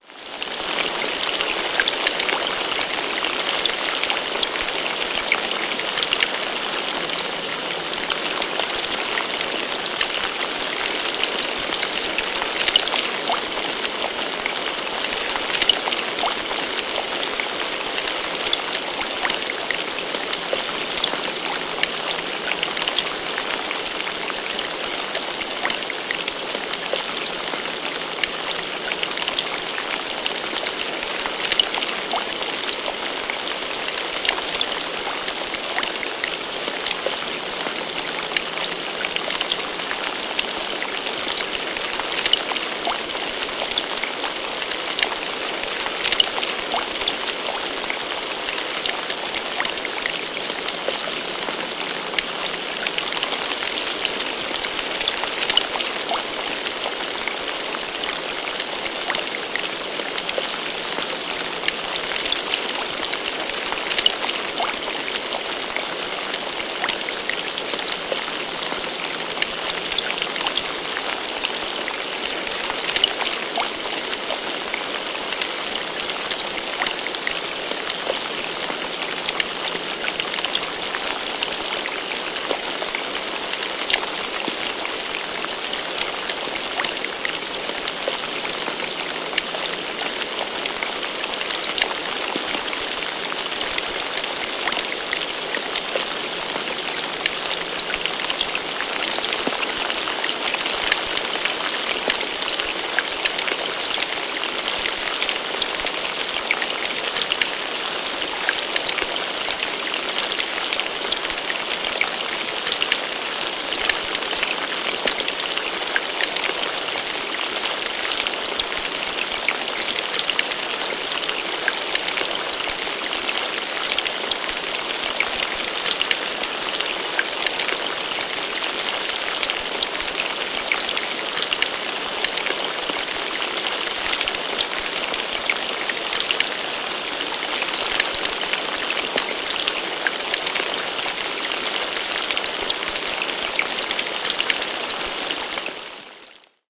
Melko kovaa sadetta järven pintaan.
Paikka/Place: Suomi / Finland / Eno
Aika/Date: 17.07.1980